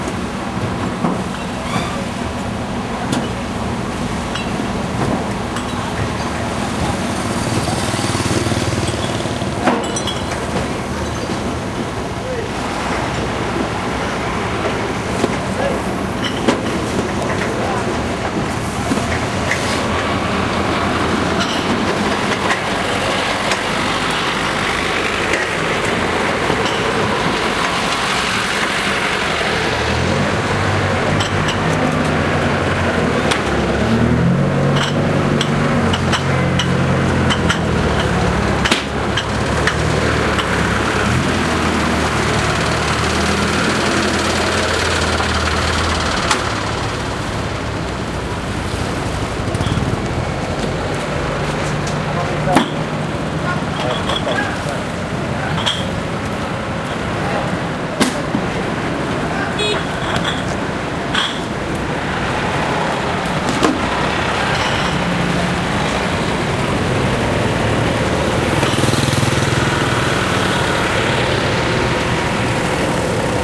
very busy dockers - loading ships at Sunda Kelapa(old harbour Jakarta-Indonesia, recording 11.02.07)